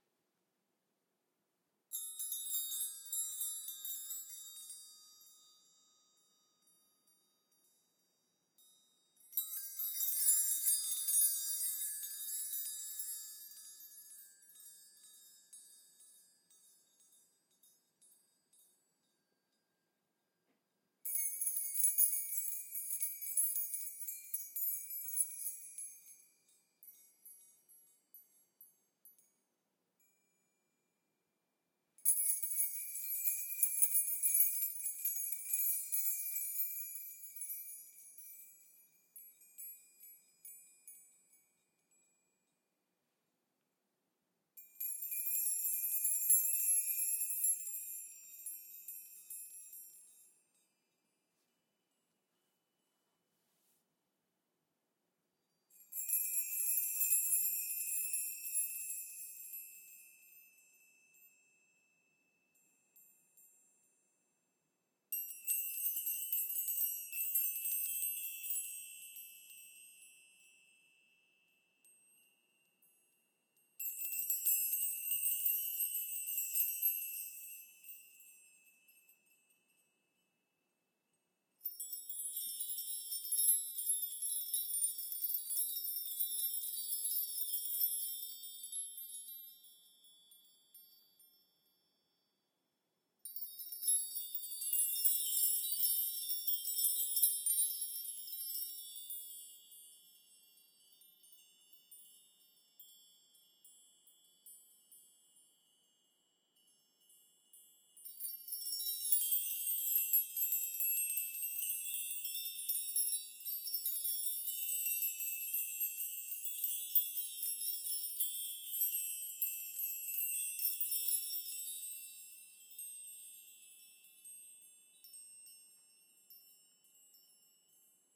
Key Chimes 08 Sections-Inc-Pitch
Close-mic of a chime bar made from various size house keys, strummed in small sections increasing in pitch. This was recorded with high quality gear.
Schoeps CMC6/Mk4 > Langevin Dual Vocal Combo > Digi 003
airy, chimes, ethereal, fairy, jingle, keys, magic, metallic, sparkle, spell, ting, tinkle, tinkles